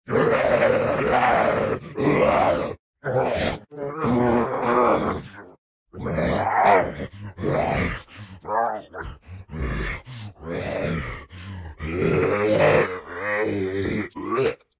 Solo Kyma Zombie 7
Single zombie vocalization, processed through pitch following and excitation via Symbolic Sound Kyma.
zombie, dead-season, kyma, growl, roar, grunt, moan, ghoul, undead, groan